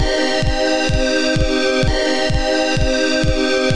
Beat Wave 001 128 bpm
percussion-loop, 128-bpm, drum-loop, percs, loop, funky, beat